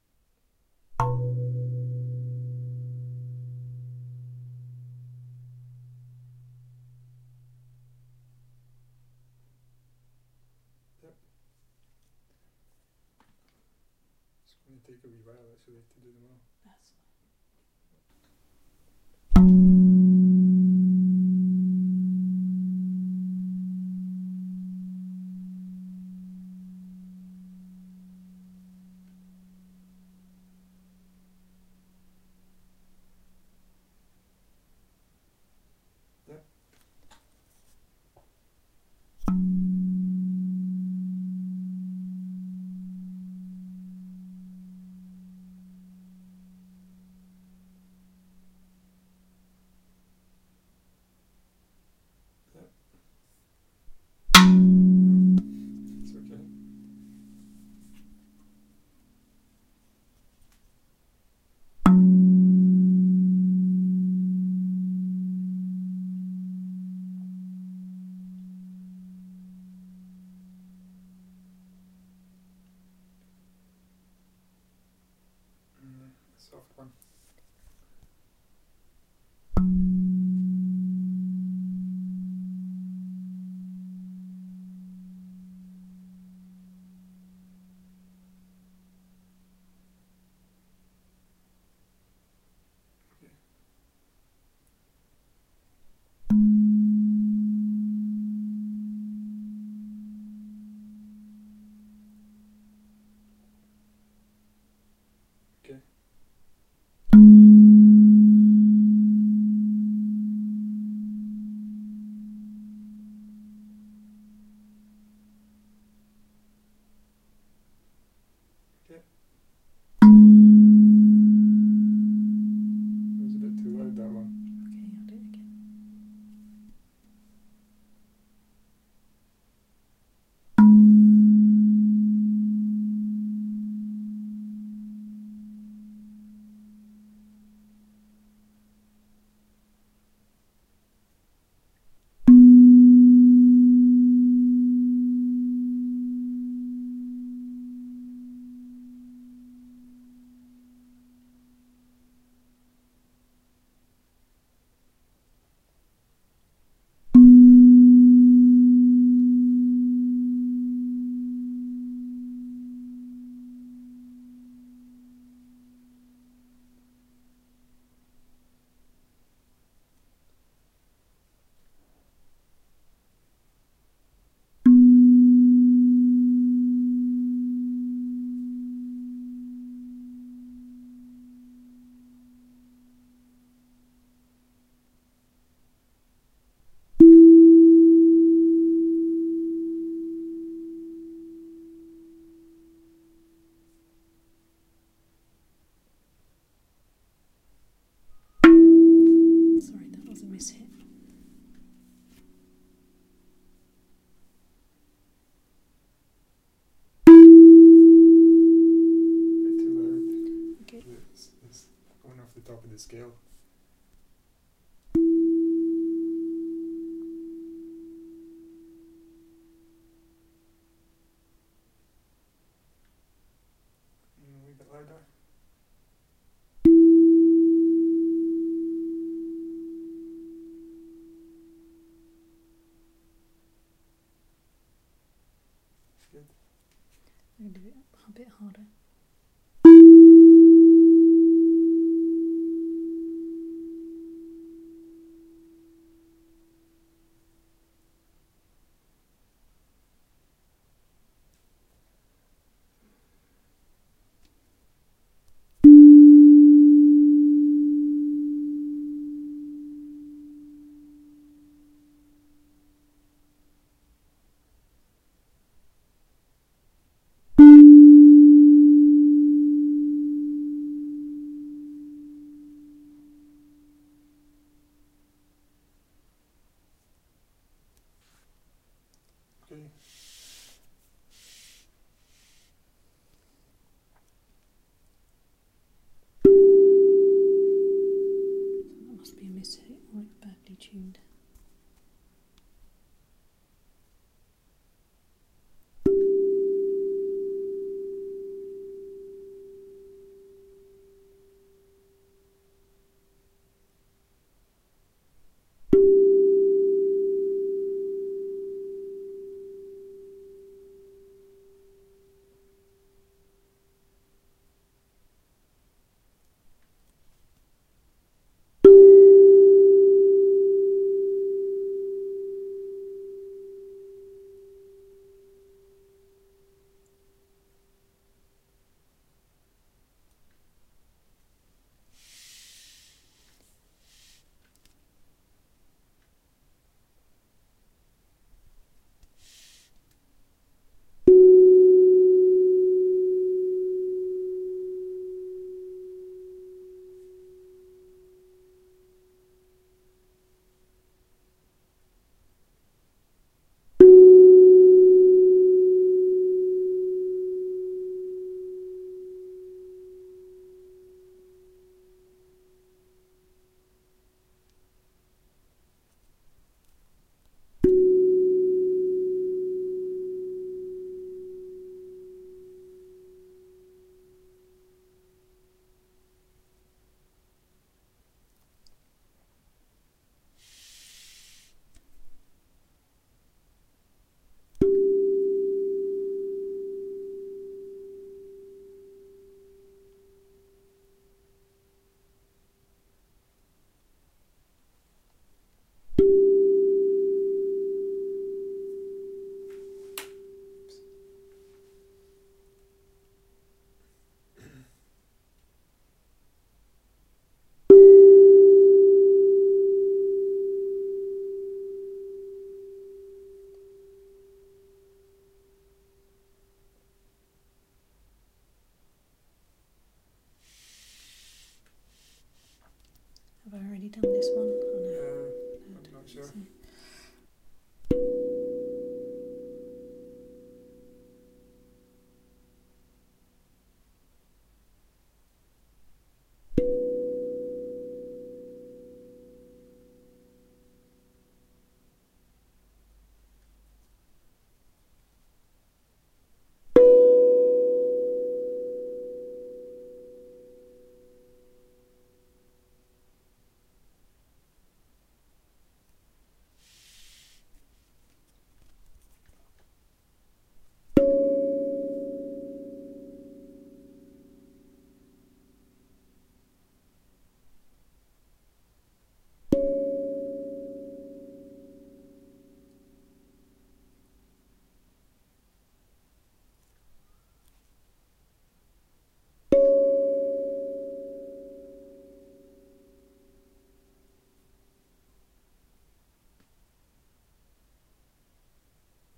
14-07-29 Steel Tongue Drum
A steel tongue drum recorded with a Samson C02
drum, hand, idiopan, pan, percussion, Steel, tongue